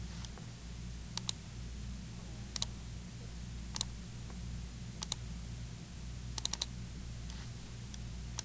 Computer Mouse Clicks
A few separate mouse clicks and one double click. This was recorded in Mono.
computer, mechanical, technology